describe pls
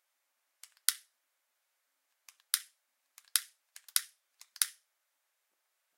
Lighter Strike
Sound of lighter being struck.